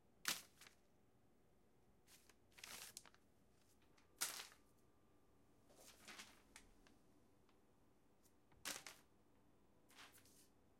ARiggs PiecesOfPaperFalling 4.2.14 2
Pieces of paper falling onto the floor.
-Recorded on Tascam Dr2d
-Stereo
Crumble, Falling, Ground, Notebook, Pieces